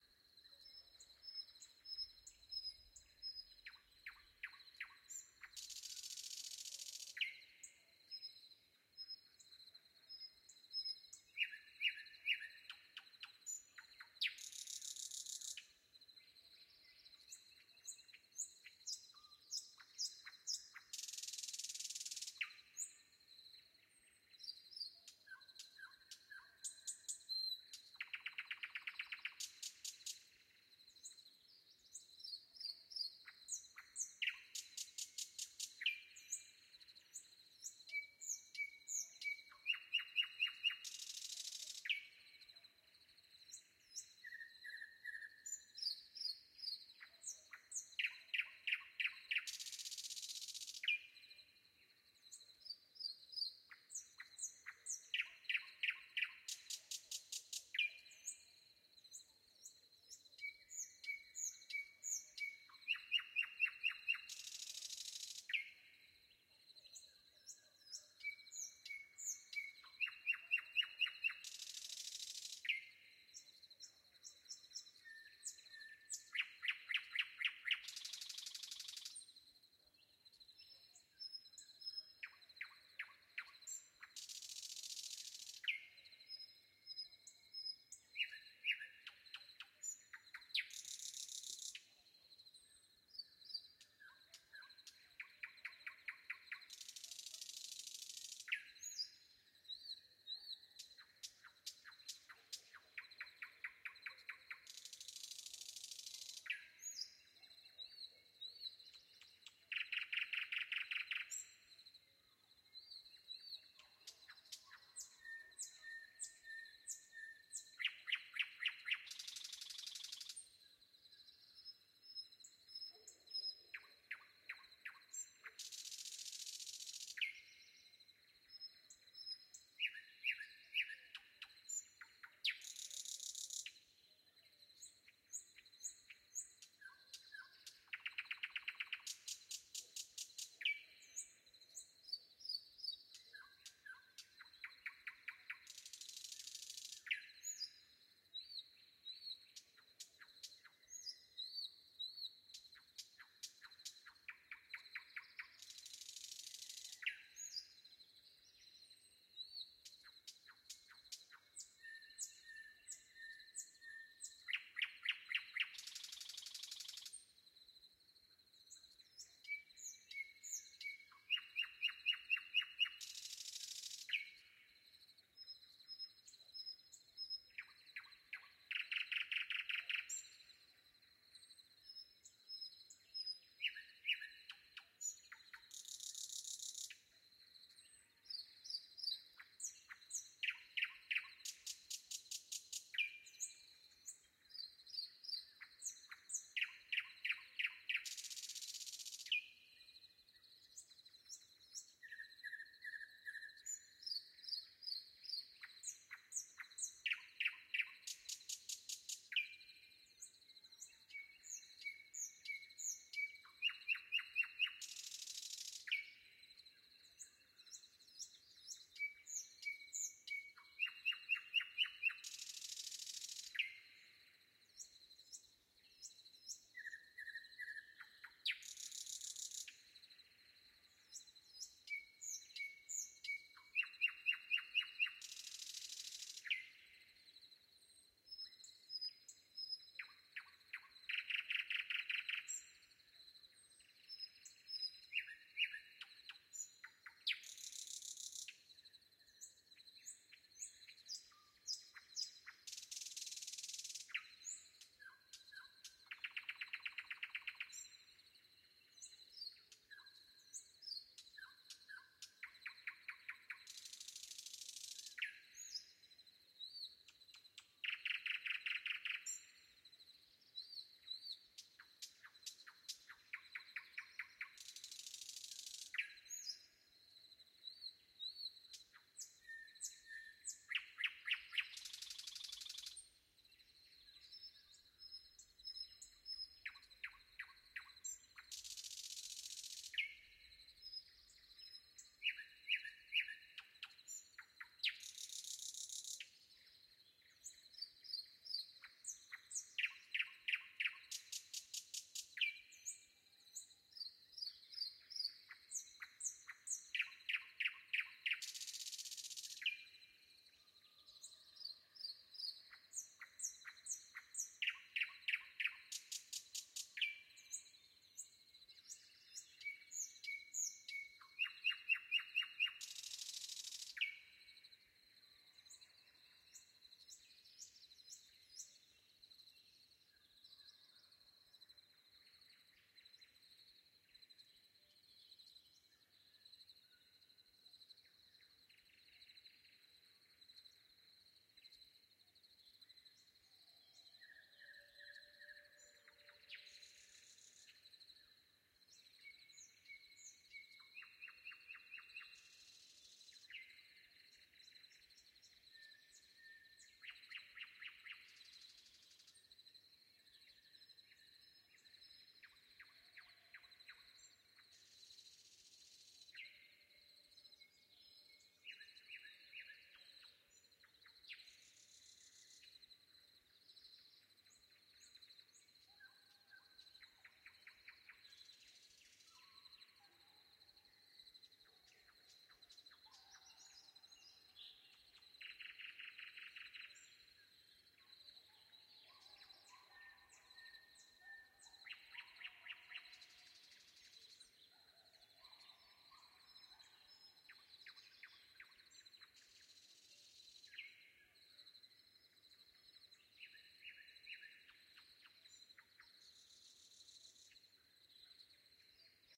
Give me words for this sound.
This review contains a transcript of the sounds of one overnight recording session featuring bird songs, cricket choirs, wind blowing, and other sounds of nature.
These soundscapes were recorded during spring in the depths of a mixed forest where a set of microphones captured a stereo panorama. The captured soundscapes are that of a meadow with a diameter of about 100 meters that produces a multi-level echo and deep reverb.
The nature concert opens with a nightingale recorded around midnight who tirelessly varies its song for an hour until it was frightened off by a creature who made a distinct rustle of foliage not far from the bird. The nightingale sings from the bush located on the left while the recording is balanced by the choirs of crickets audible, for the most part, in the right channel. In the center of the stereo panorama, you can clearly hear the wind sir the crowns of tall trees and then gradually subside towards the end of the track.